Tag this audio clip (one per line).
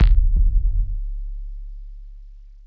drum
bass